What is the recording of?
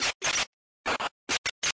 A few sample cuts from my song The Man (totally processed)
freaky, glitch, glitchbreak, breakcore, techno